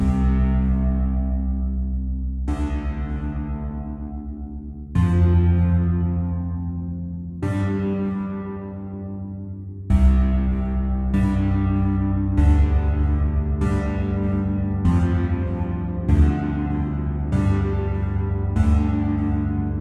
Morphagene reel. Piano loop with 2 splices
loop, mgreel, morphagene, piano